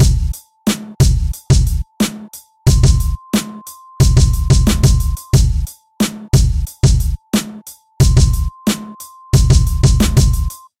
Rap beat loop in which I used a whistle sample. Created in LMMS.